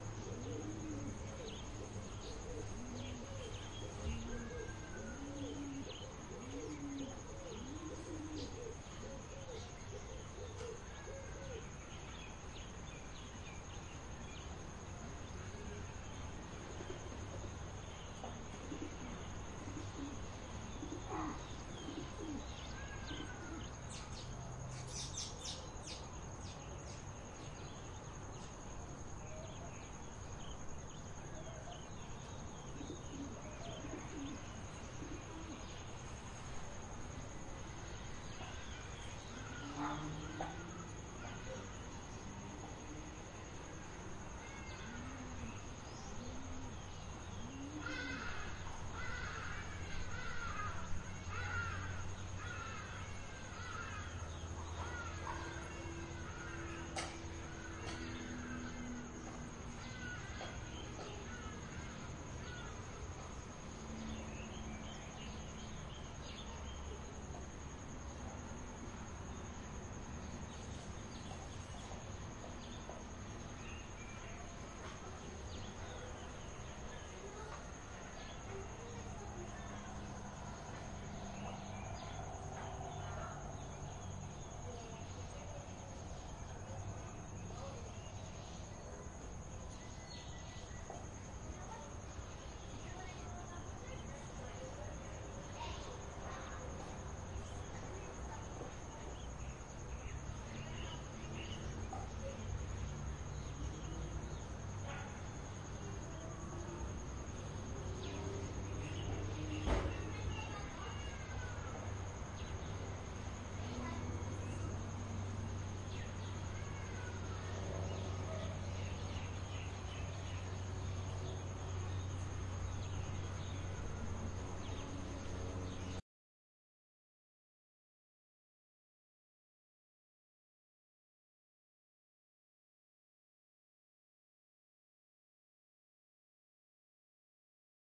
Late afternoon quiet suburb noise